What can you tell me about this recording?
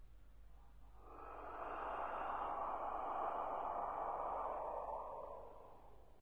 Scary Breath
the ghost is breathing
Recorded with AV Voice Changer Software
breath, whistle, moan, house, scary, creepy, haunted, ghost